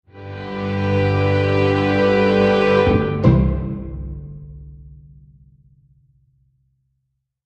Used at the end of a trailer to put emphasis on the last line.